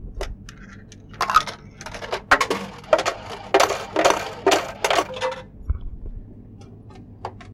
RIVIERE Anna 2017-2018-Casino jackpot
To product this sound, I recorded the sound of a piece which has been put in a coffee machine. We can hear the trajectory of the piece in the machine. To emphasize the piece’s sound, I first reduced the ambiant noise on Audacity. Then I modified the bass and treble and I added some silence at the beginning and at the end of the sound. I also used a leveler to make sure that we can clearly hear the moment when the piece is falling in the machine without having a saturation of the sound.
The sound of the piece reminded me of the pieces which fall when we won something with a casino machine.
Typologie/Morphologie de P. Schaeffer
Descriptif : Ce son est assez difficile à cerner, on perçoit du continu tonique (N') ainsi que des itérations toniques (N") et variées (V").
Masse: Il s’agit de sons cannelés puisqu'il y a un mélange de différents objets sonores.
Grain : Le bruit est plutôt rugueux dès le début, on entend clairement à la suite une succession de notes rauques.
cash, casino, money, pieces, rolling